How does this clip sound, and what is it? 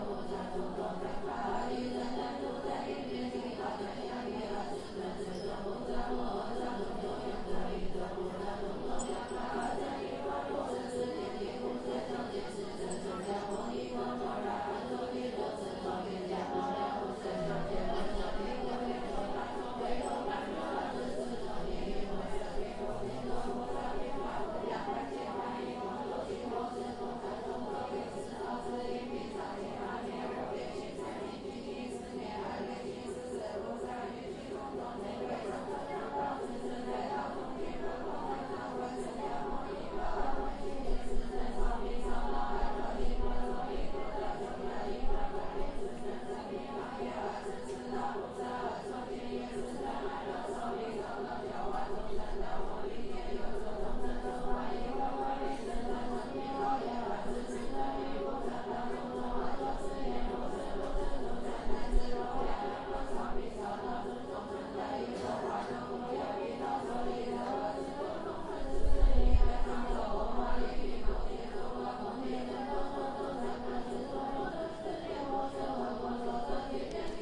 chinese citytemple singing mantra
chinese temple music recorded in Shanghai